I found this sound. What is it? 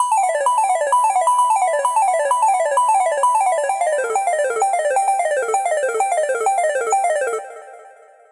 ARP Slot Machine Minor
This sound was created using the "Syleth1" synthesizer.
BPM 130
Vegas, Jackpot, ARP, Slot, Sylenth1, Machine, Minor, Synthesizer